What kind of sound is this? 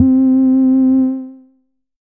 Basic saw wave 4 C4
This sample is part of the "Basic saw wave 4" sample pack. It is a
multi sample to import into your favorite sampler. It is a basic saw
waveform.There is quite some low pass filtering on the sound. There is
also a little overdrive on the sound, which makes certain frequencies
resonate a bit. The highest pitches show some strange aliasing pitch
bending effects. In the sample pack there are 16 samples evenly spread
across 5 octaves (C1 till C6). The note in the sample name (C, E or G#)
does indicate the pitch of the sound. The sound was created with a
Theremin emulation ensemble from the user library of Reaktor. After that normalizing and fades were applied within Cubase SX.
reaktor, saw, multisample, basic-waveform